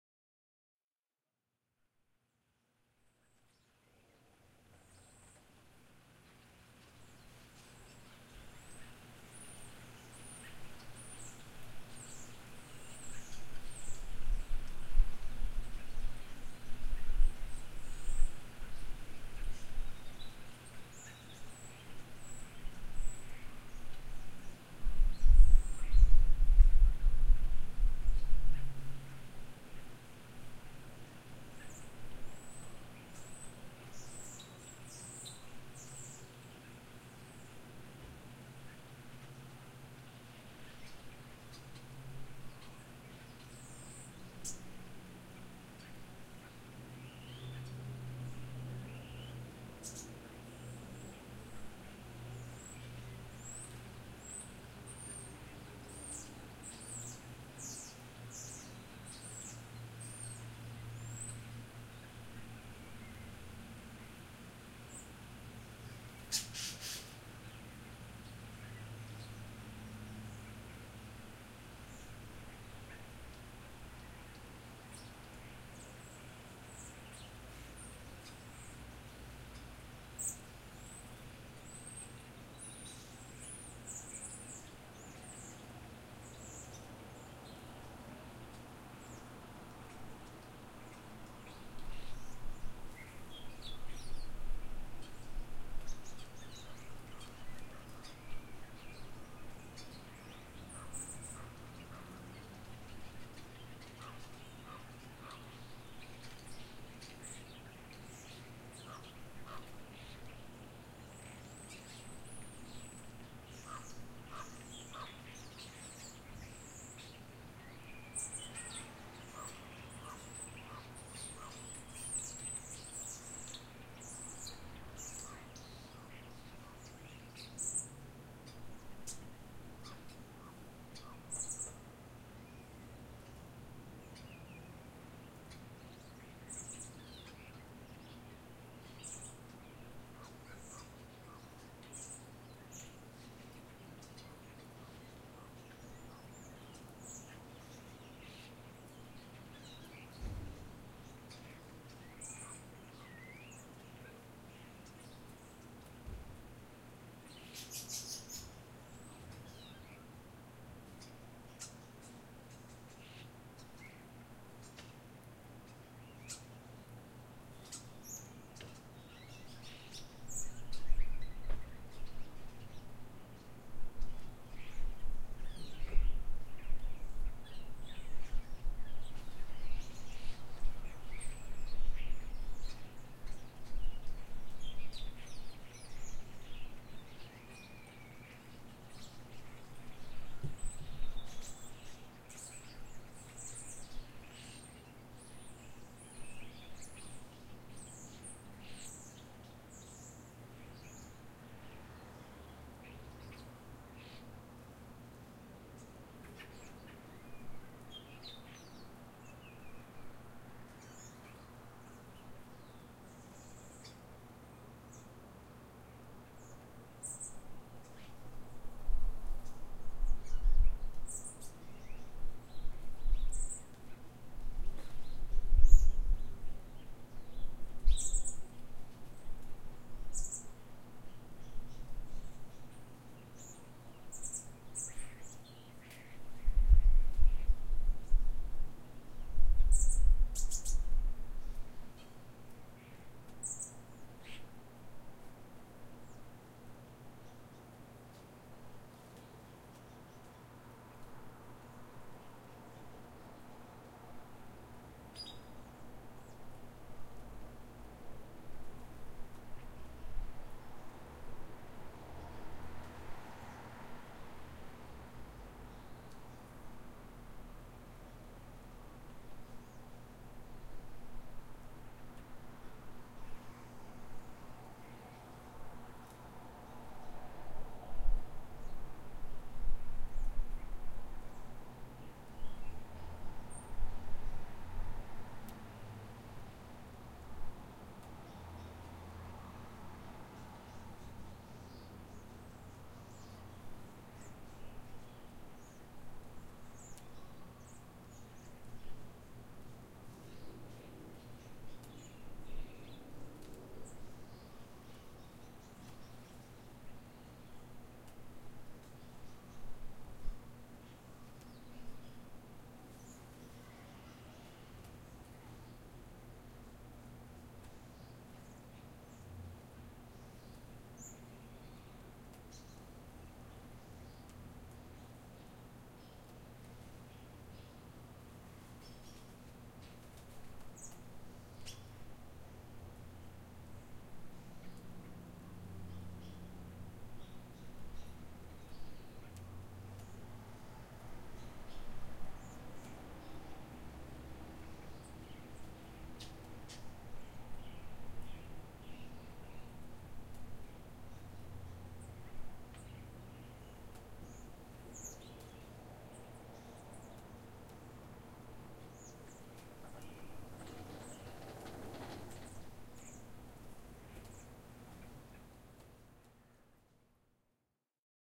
A recording of backyard birds with an H4N Zoom in the Fall 2015.